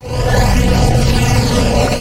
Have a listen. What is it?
jetpack fly
video, sounds